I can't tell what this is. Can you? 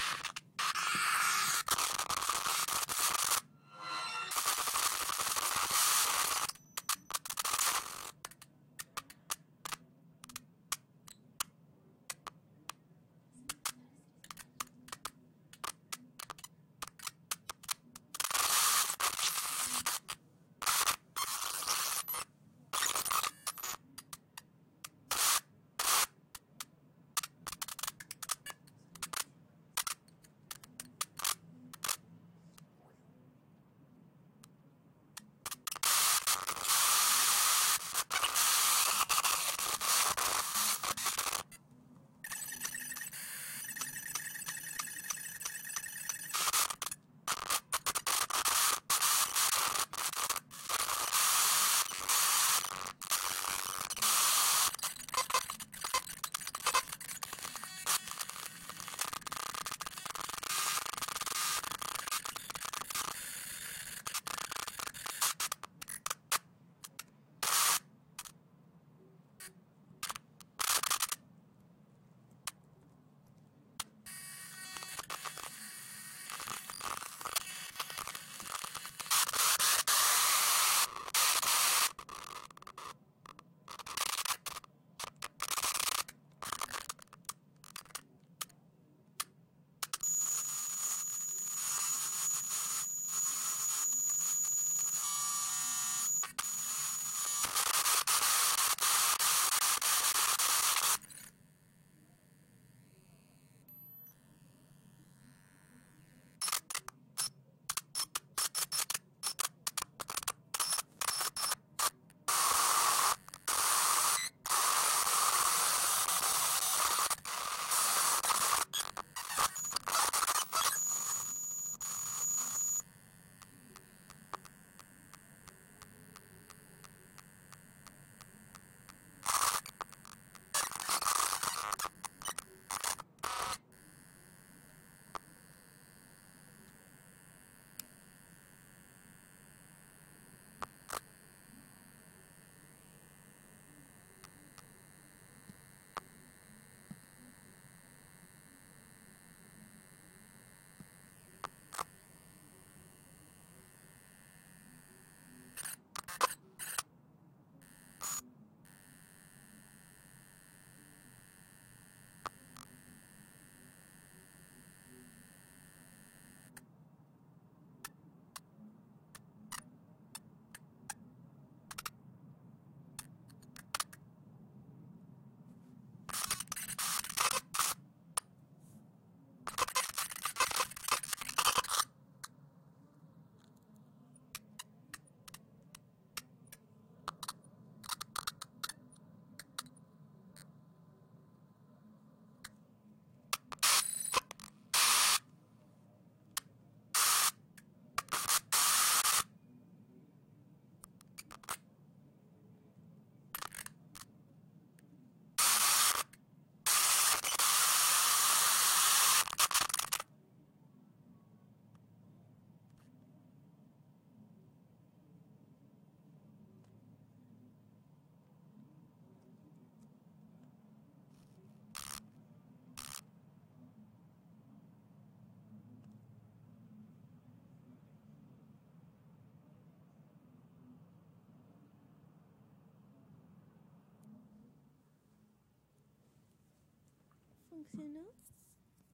lisa crash
My Linux system crashed (first time!) and started doing some strange sounds.. it was strange because I wasn't playing music nor watching a video, maybe it's an alien transmission!
glitch, noise, recording